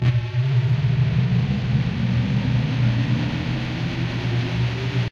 Space Ship Sound
Alien spaceship sound. I am the author of this sound.
alien, spaceship